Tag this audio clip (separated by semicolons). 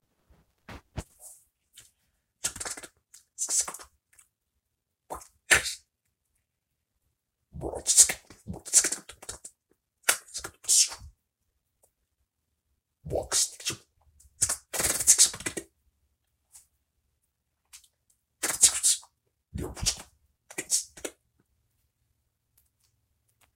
monster; creature; space; weird; gabber; clicking; bug; Insectoid